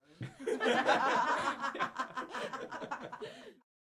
group of people laughingRecorded with 2 different microphones (sm 58 and behringer b1) via an MBox giving a typical stereo feel.